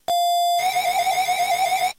sample of gameboy with 32mb card and i kimu software